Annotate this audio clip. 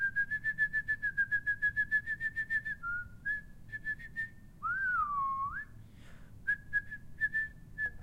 Whistle 3 High
high pitch whistle